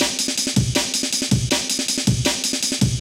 FinalBreak Haine
Another break from the famous Amen Break
amen, bass, breakbeat, dnb, drum, drum-and-bass